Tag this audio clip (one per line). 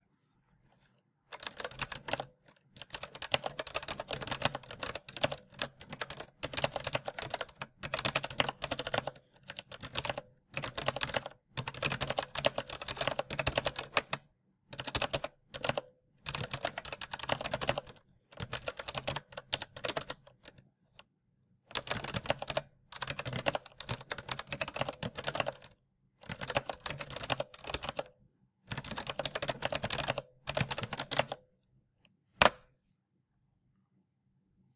brown
cherry
cherrymx
computer
ergonomic
keyboard
keystroke
kinesis
mechanical
mx
type
typing